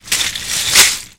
LEGO Pour 2
Pouring out a bag of LEGO bricks on a table
LEGO, toy, fall, brick, pour, bag, drop, plastic, click